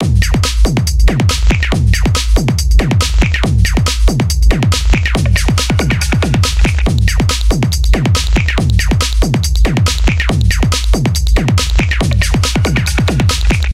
compelectro 140bpm
snare, electro, hi-hat, electronic, kick, loop, 140, drum, drums, beat, rhythm, drumloop, bpm